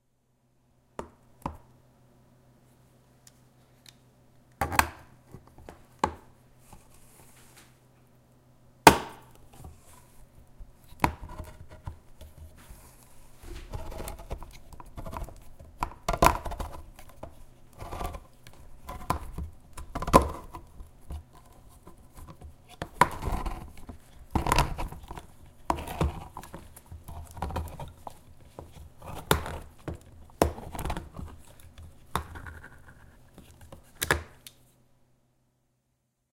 Using a can opener
can-opener, crunch, wrench